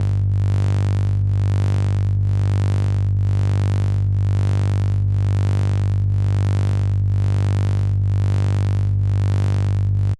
Scfi Electric Hum 01
Electric, Scfi, Hum